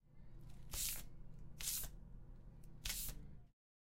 Hand caressing paper